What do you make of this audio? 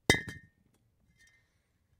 concrete block 3
Concrete blocks knocked together.
Recorded with AKG condenser microphone to M-Audio Delta AP soundcard
concrete-block, effect, hit, stone, strike